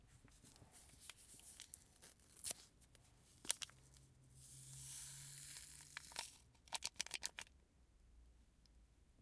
Pouring a sugar sashay packet into a cup of coffee